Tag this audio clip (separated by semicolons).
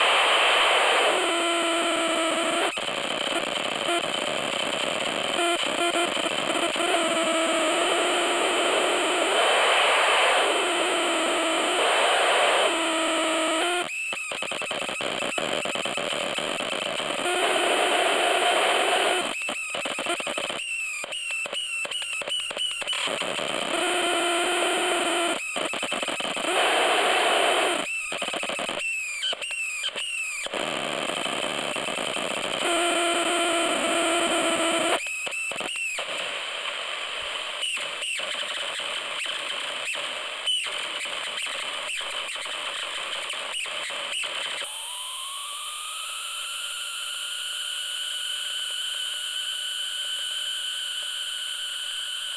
am; distortion; electronic; field-recording; fm; frequency-sweep; glitch; industrial; interference; lo-fi; lofi; noise; pulsating; radio; shortwave; static; transmission; white-noise